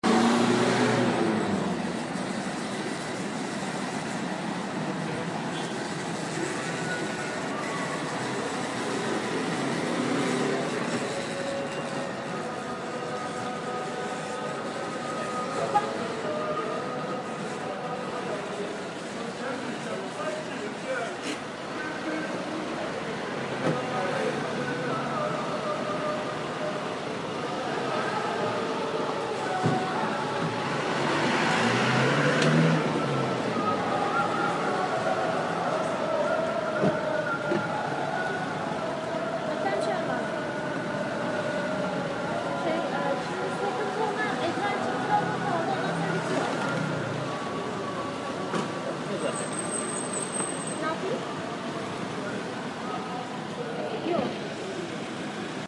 Street sound in Istanbul, with praying in PA